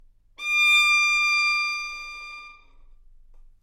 Violin - D6 - bad-dynamics
Part of the Good-sounds dataset of monophonic instrumental sounds.
instrument::violin
note::D
octave::6
midi note::74
good-sounds-id::3745
Intentionally played as an example of bad-dynamics
D6, good-sounds, multisample, neumann-U87, single-note, violin